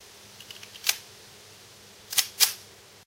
this is the sound of me loading my kimber 1911 .45 pistol